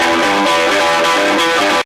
Breaks Driver Guitar 01
big beat, dance, funk, breaks
funk, breaks, big, beat, dance